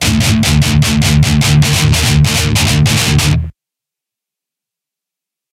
DIST GUIT 150BPM 10
Metal guitar loops none of them have been trimmed. they are all 440 A with the low E dropped to D all at 150BPM
DUST-BOWL-METAL-SHOW, REVEREND-BJ-MCBRIDE, 2-IN-THE-CHEST